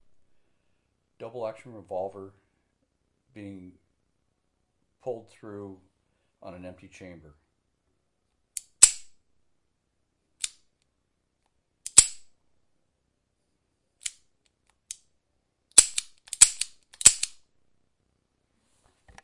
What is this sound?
Double Action Revolver Empty Chamber
Empty chamber of a double action revolver
action, emptying, shell, handgun, shells, chamber, empty, gun, casings, revolver, casing, hand, double